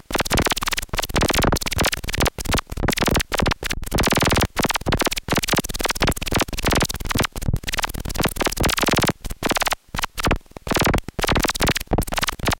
MS10-fluttr
MS10 random filter flutter, probably with the MS04